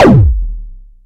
A digital sound that resembles a kick drum. Made with Nord Modular synth.